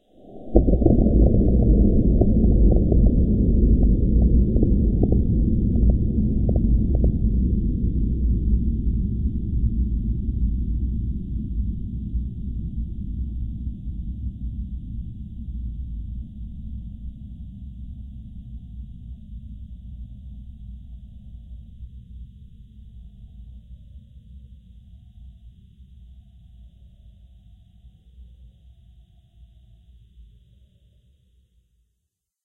Nuclear distant
Distant nuclear blast.
explosion, nuclear